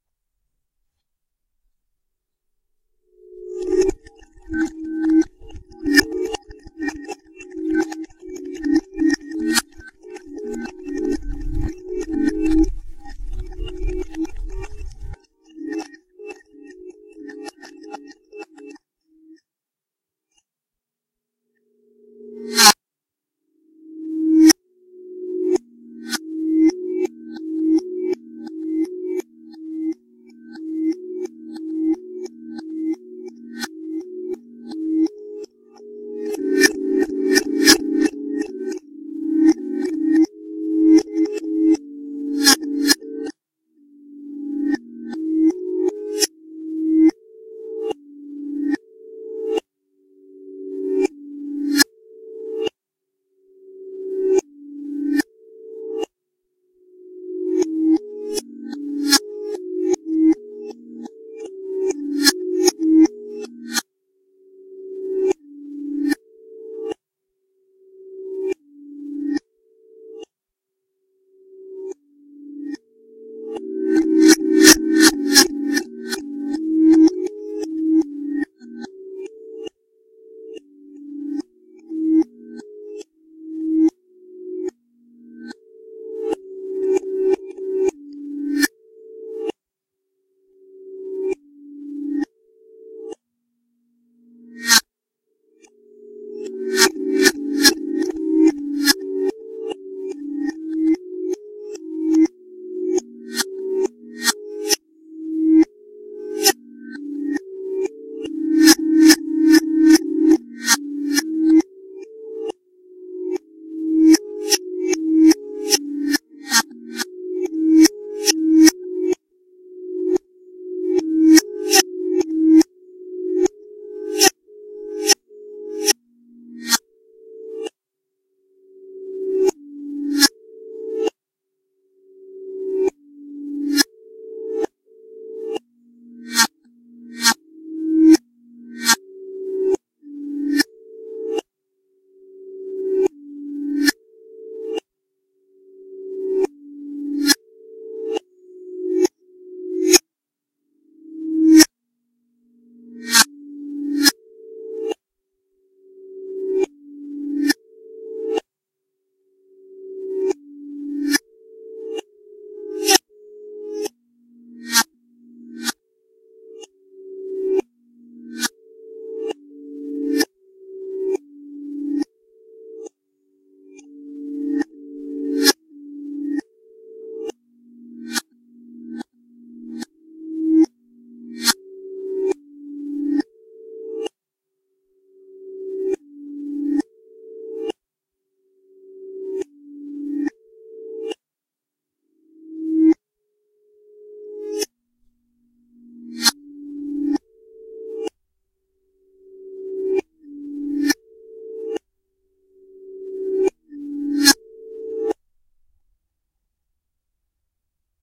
Made by playing a funky little coconut instrument I purchased off a beach vendor in the Philippines and then reversing in Audacity.